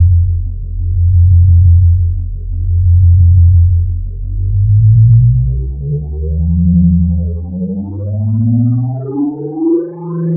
SCIFI SPACESHIP 01
This was a bit like a test to see how Elektrostudio's Model Pro (Moog Prodigy VST Freeware) works and sounds its pretty cool.
alien, scifi, ship, space, star, vehicle, wars